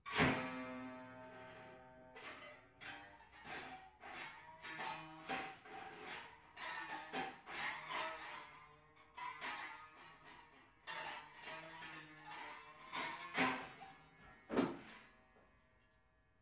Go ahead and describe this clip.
trying to cut piano wire